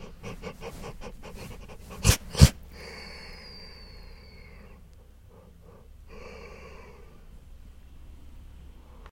Dog Sniffing (Near Distance)
breathing, dog, dogs, growling, nose, sniff, sniffing